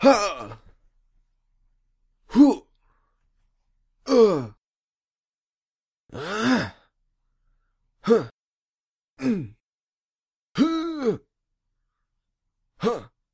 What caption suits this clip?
human grunts 2
Me grunting, you could use it for fight scenes etc.
combat, fight, fighter, groan, grumble, grunt, hand-to-hand, human, male, man, moan, pain, punch, scream, shout, vocal, voice, yell